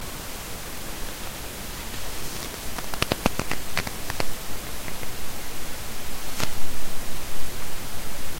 fist clenching (4)
Just made some anime style fist clenching sounds cause I wasn't able to find it somewhere.
clench, anger, clenching, anime, clenched, fist, fight